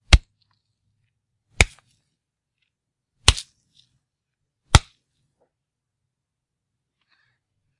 punch with splats
The sound of a juicy impact for a fight, or anything else your creative brains can come up with.
fight sounds recorded for your convenience. they are not the cleanest of audio, but should be usable in a pinch. these are the first folly tests iv ever done, I hope to get better ones to you in the future. but you can use these for anything, even for profit.